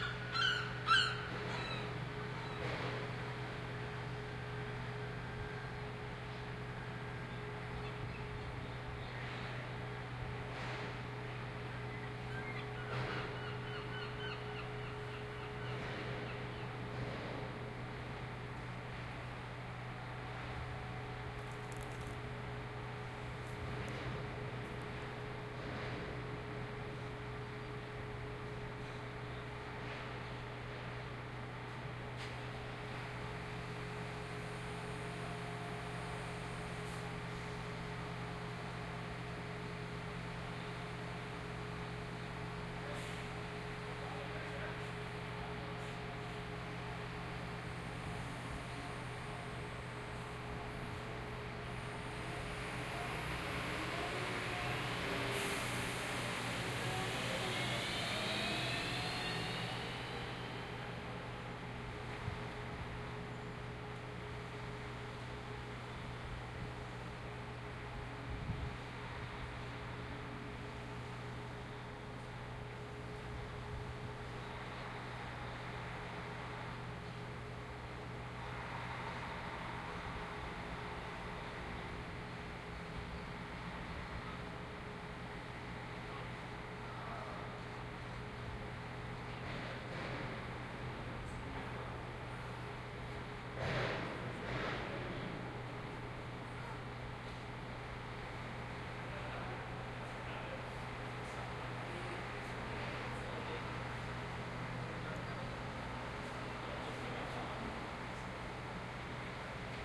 on the ferry
On board a ferry on the way from the Netherlands to England.OKM microphones, A3 adapter into R-09HR recorder.
binaural, boat, ferry, field-recording, seagull